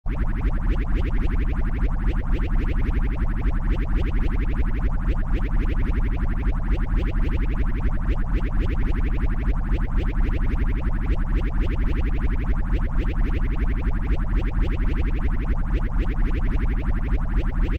Created entirely in Granulab, this soundscape is a virtual rendition of Chainsaw Charlie's Bubbler.